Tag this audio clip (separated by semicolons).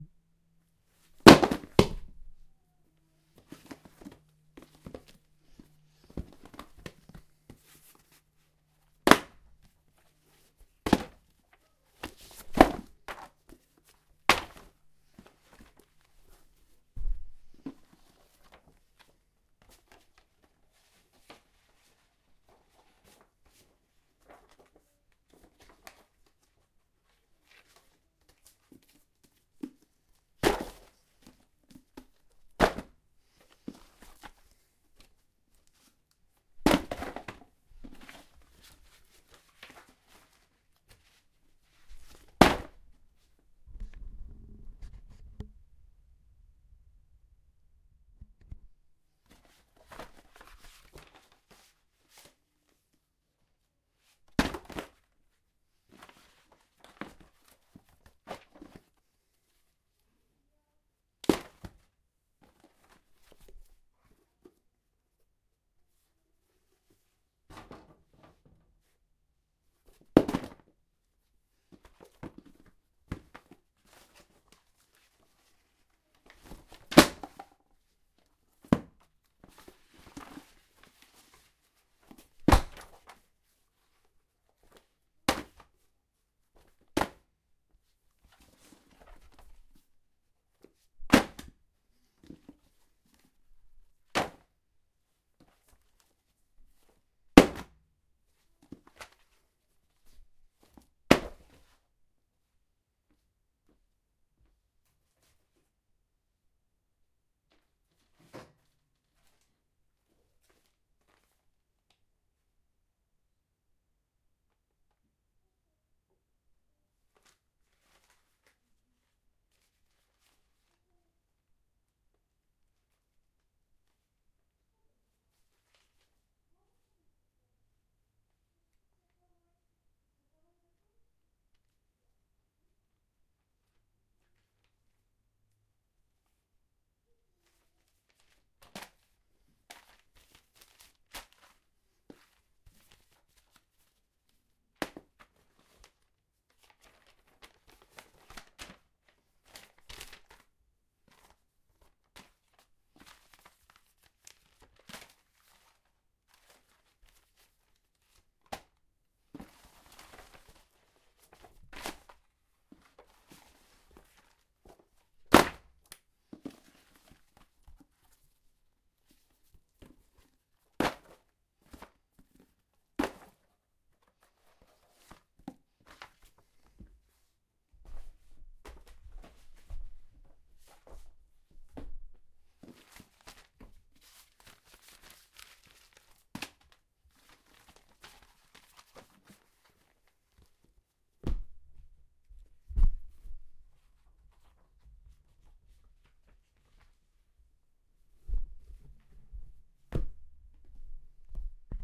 hit; drop; Book; shelf